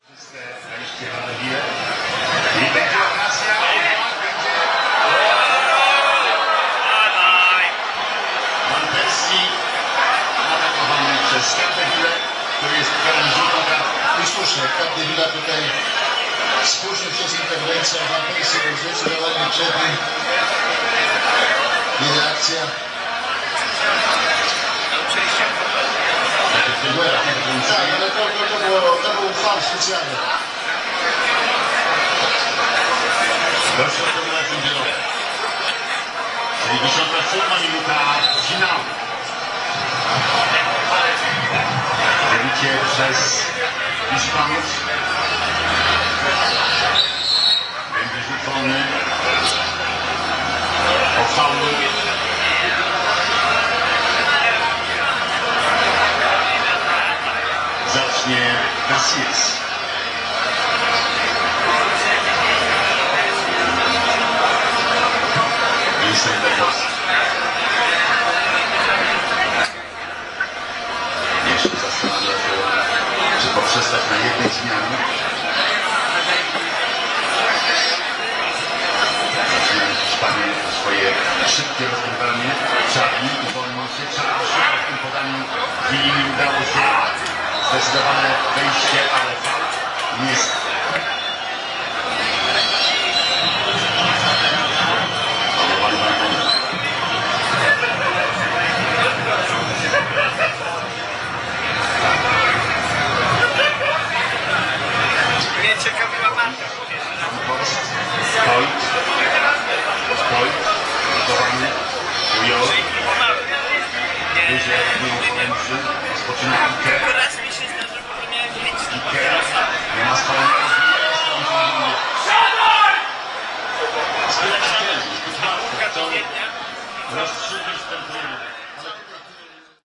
57 minut holland spain match110710
11.07.2010: between 20.30 -23.30. in the beer garden (outside bar) on the Polwiejska street in the center of Poznan in Poland. the transmission of the final Fifa match between Holland and Spain.
beer-garden, fans, field-recording, fifa, holland-spain-match, noise, people, poland, poznan, transmission, voices, vuvuzele